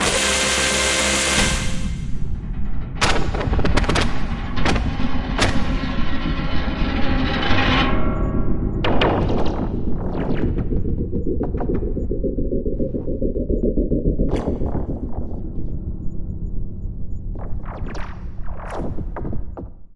FCB NyolcnutSFX 08
evolving, effect, sound-design, soundeffect, detritus, sounddesign, fx, sfx, abstract, long, metal